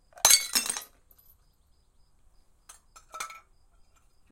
Bottle Smash FF214
1 quick bottle crash, tingle, hammer, extended late glass movement.
falling-glass, medium-pitch, bottle-smash